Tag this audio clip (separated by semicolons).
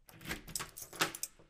door; key; unlock; keys